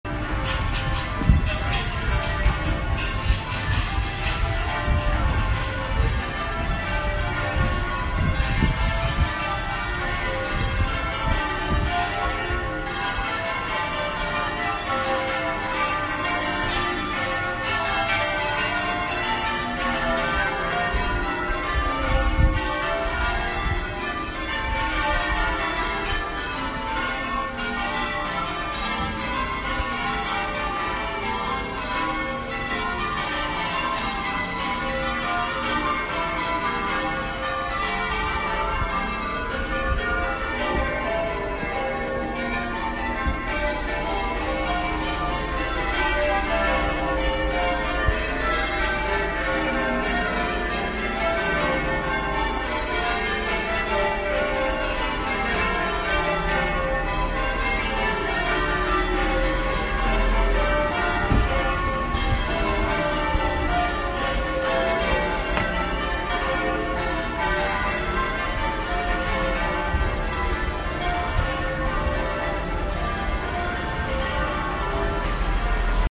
Distant Church Bells
Recording of some church bells going off. I didn't catch the beginning, unfortunately, but it might help at some flavor to a track or sound environment! Recorded on an iPhone.
church-bell, church